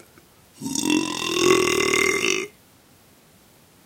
Big Belch
outtake during a stream